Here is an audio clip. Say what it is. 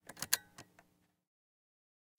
Marantz PDM201 PLAY (unpowered)
Sounds from my trusty Marantz PMD201 cassette recorder.
It wasn't powered at the time, these are just the sounds of the button action.
AKG condenser microphone M-Audio Delta AP
cassette, button, click, tape